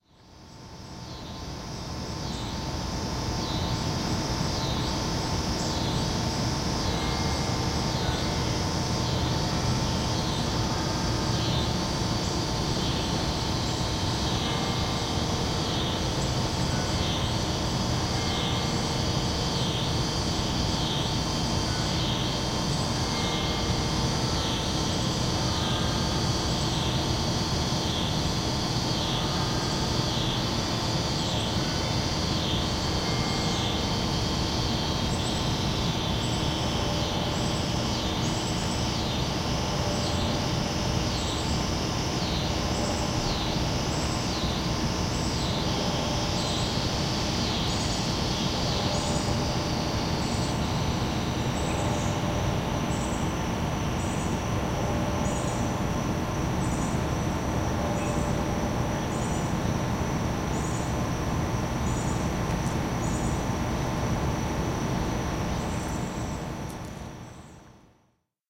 Ben Shewmaker - UCA Bells
Church bells off in the distance near my old college.